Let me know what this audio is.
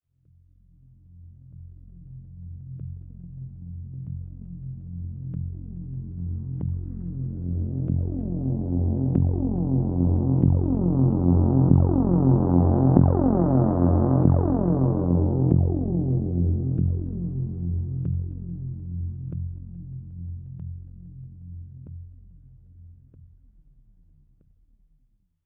An effected trumpet pitched down